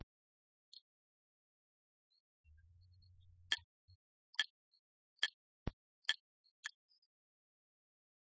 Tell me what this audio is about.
Failing Hard Drives